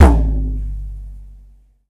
pwdrum biggerdruminsidegreen
Mono samples of a small children's drum set recorded with 3 different "sticks". One is plastic with a blue rubber tip that came with a drum machine. One is a heavy green plastic stick from a previous toy drum. The third stick used is a thinner brown plastic one.
Drum consists of a bass drum (recorded using the kick pedal and the other 3 sticks), 2 different sized "tom" drums, and a cheesy cymbal that uses rattling rivets for an interesting effect.
Recorded with Olympus digital unit, inside and outside of each drum with various but minimal EQ and volume processing to make them usable. File names indicate the drum and stick used in each sample.